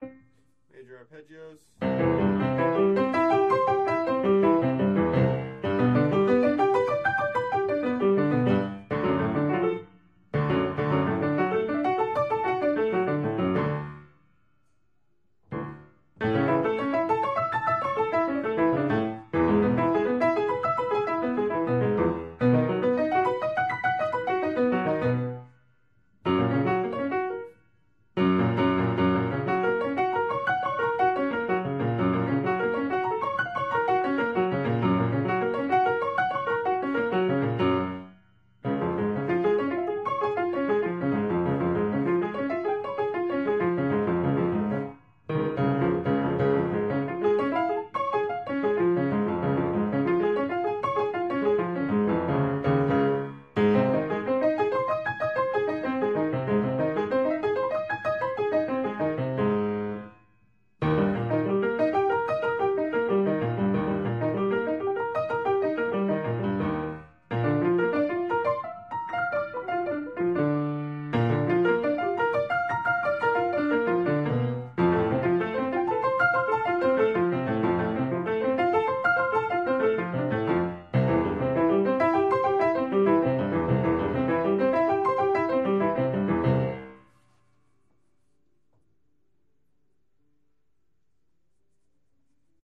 Practice Files from one day of Piano Practice (140502)